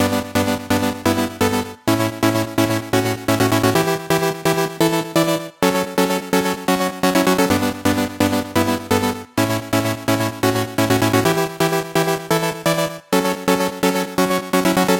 chiptune melody
An 8-Bit chiptune loop!
bit, cool, gameboy, harmony, nes, oldschool, rhytm, sega, sine, synth